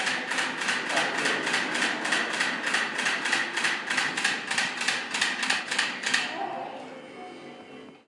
Toy Gun Trigger Distance
Arcade game with a gun.
arcade, buttons, game-sound, joystick